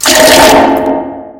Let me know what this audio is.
SICARD Tristan 2014 2015 SmallPeeInBigHangar
Made with water falling in toilets.
I puted a reverb effect.
Hangar, Toilets, Water-falling